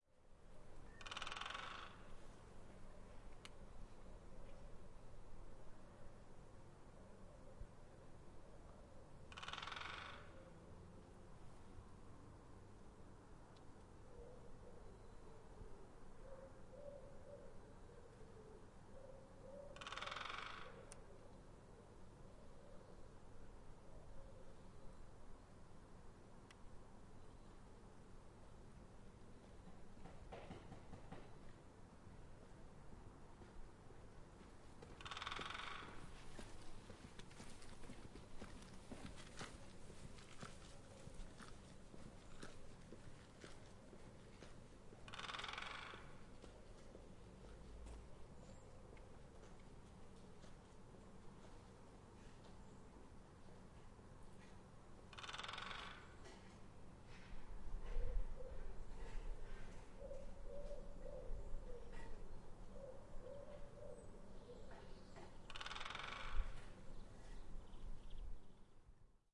120129 woodpecker hammering on antenna
Woodpecker in our neighbourhood marking his territory. This one found out that hammering on those old fashioned roof antenna is much louder therefore more effective than using dry brunches. Zoom H4n
animal-behaviour, hammering, morse, signal, territory-marking, woodpecker